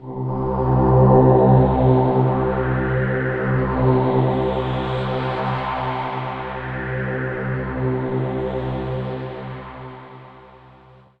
an ominous drone
drone, omenous